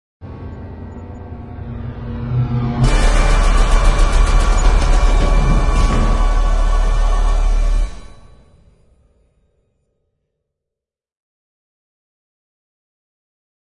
Cluster in D-major
Horror cluster sound accent in D-major